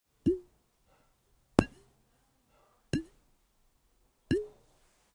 A few pops on the top of a small glass bottle.